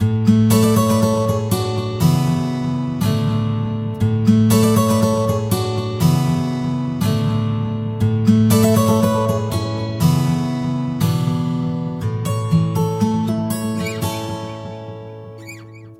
Acoustic Guitar chill intro 120bm
This is a mellow acoustic nylon guitar intro with a bit of delay and reverb recorded at 120bpm
intro, guitar, acoustic, nylon-guitar, delay, 120bpm